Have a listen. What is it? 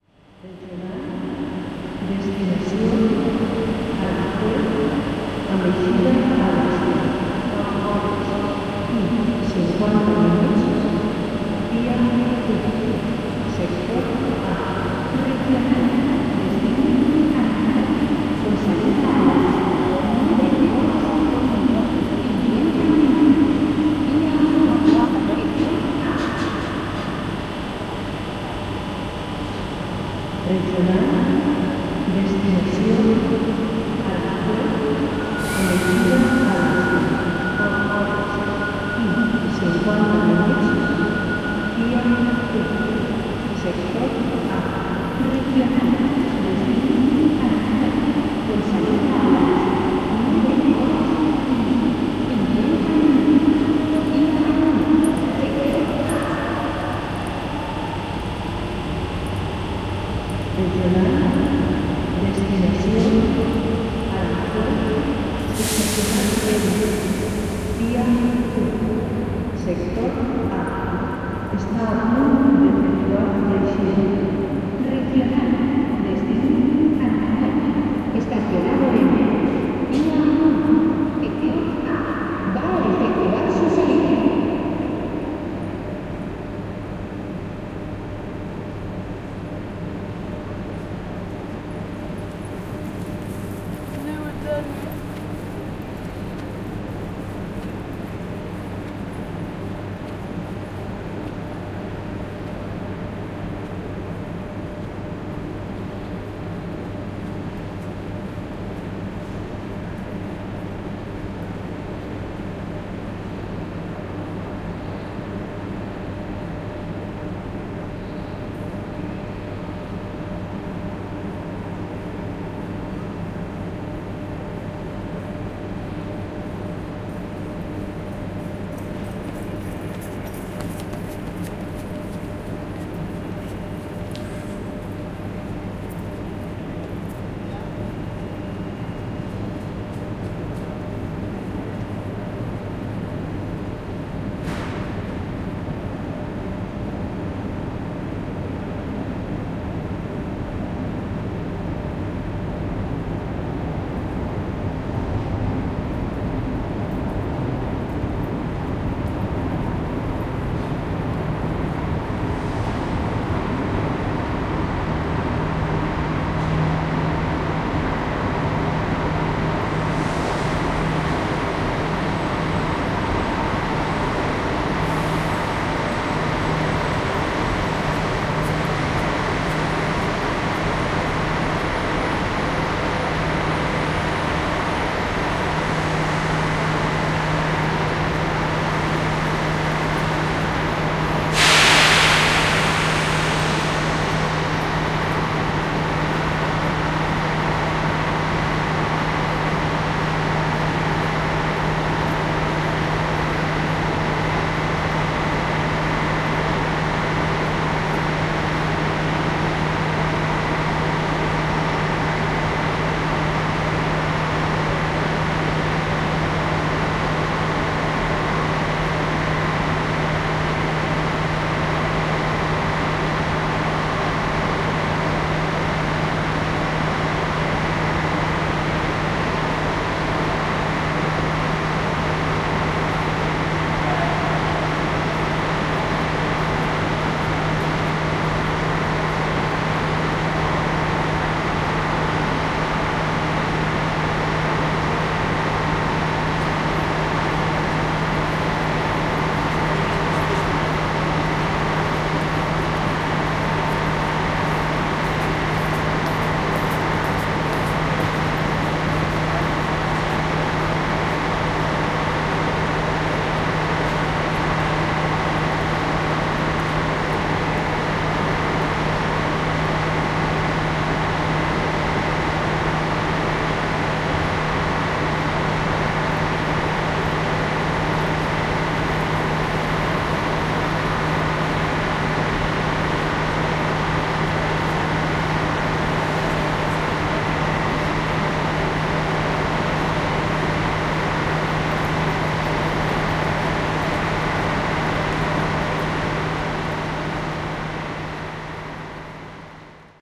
Train Station Loudspeakers
The information service announce the depart and arrival of trains
human, people, station, Trains, Valencia, voice